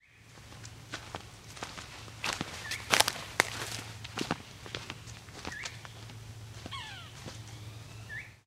Walking on Trail in Spring with Birds
Walking on a forest trail in spring